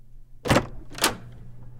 dorm door opening
This is a large door with an industrial, metal doorknob opening at a normal pace.
door, dorm, interior, open, opening